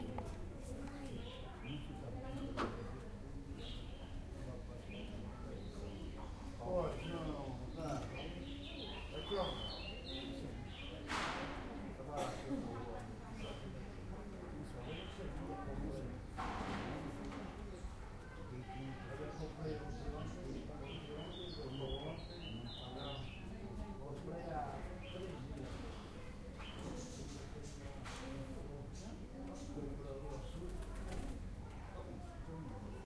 STE-021-lisbon alfama07
The ambience of the Alfama district in Lisbon.
city, field-recording, lisbon, portuguese, soundscape, streets, voices